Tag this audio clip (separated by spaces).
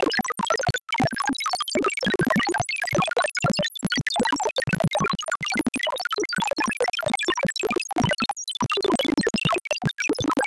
AI Artificial Artificial-Intelligence Computer-Tech Dehumanization Droid Film Filmmaker Foley Frontier Hal-2001-Odyssey Hi-Tech Low-Tech New-World-Order Power-Rangers R2D2 Robot Science-Fiction Star-Trek Star-Wars Tech Technology calculate computer space